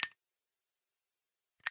Zippo Lighter - Opening & Closing
Me opening and closing one of my many zippo lighters.
closing, zippo, metallic, opening, lighter